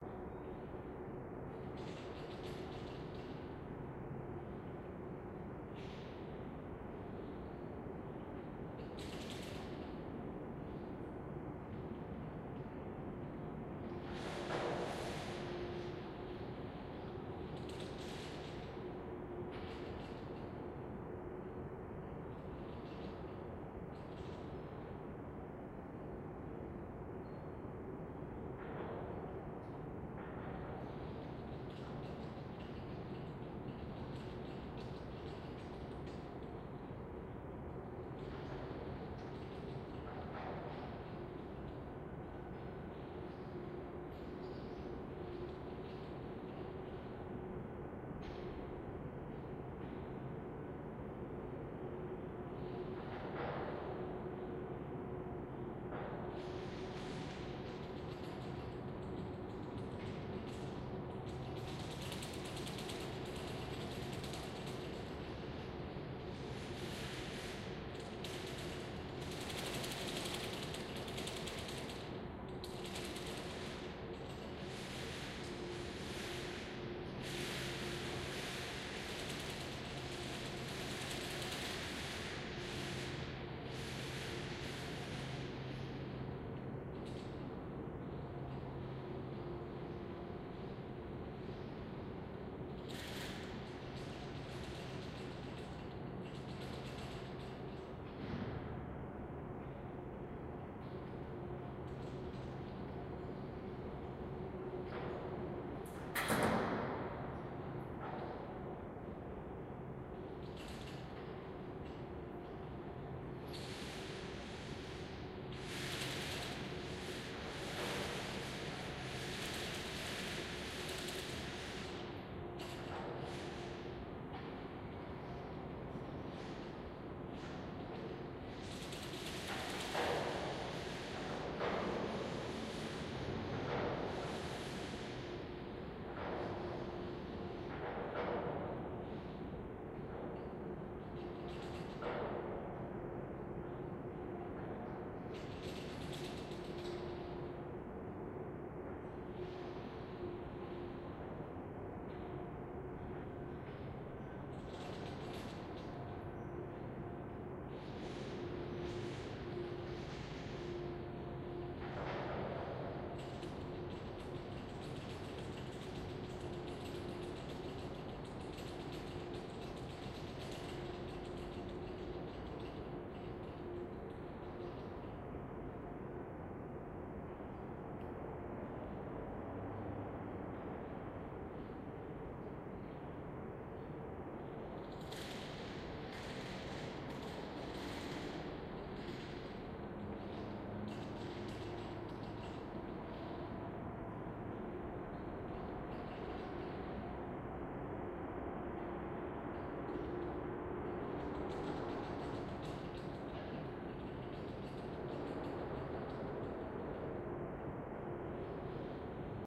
abandoned warehouse near coal mines. White noise, metal squeeze
empty, dark, tone, hall, industrial, rumble, atmosphere, ambience, soundscape, deep, metal, field-recording